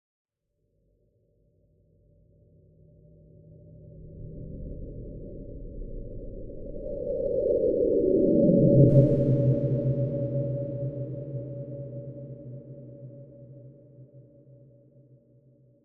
Presence - Sci-fi
A sci-fi like sound effect, made for a feeling of
fear washing over someone. Could be from something looking
back at you, or maybe something passing by you etc...
Created from a heavily editing recording of the
wall gas heater in my home.
This sound, as well as everything else I have uploaded here,
is completely free for anyone to use.
You may use this in ANY project, whether it be
commercial, or not.
although that would be appreciated.
You may use any of my sounds however you please.
I hope they are useful.
creature, eerie, effect, efx, fear, free, fx, lurk, pass, pass-by, presense, sci-fi, sense, sfx, sound-design, sound-effect, spooky, travel, watch, watching